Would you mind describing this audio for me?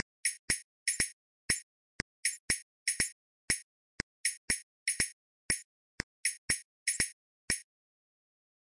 Sincopa media(ejercicio1)
Sonido con sincopa media
sonido, clap, interesante